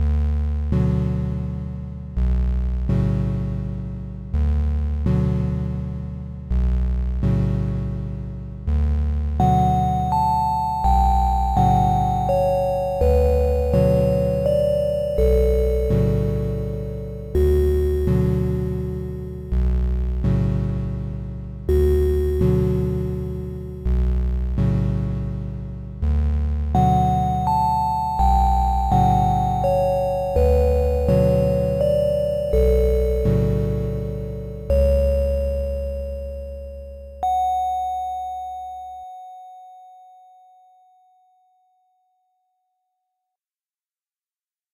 Satie - Gymnopédie n°1
Extrait de Gymnopédie n°1 d'Erik Satie en 8-bit